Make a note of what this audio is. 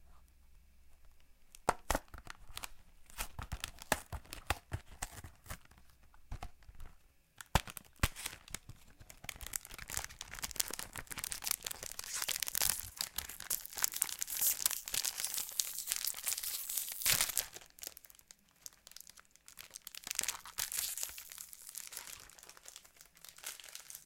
Pocky box and pack open
I recorded opening Pocky (Snack) Box and pack by Audio Technica AT2020usb microphone.
Box, Open, Pack, Pocky, Thai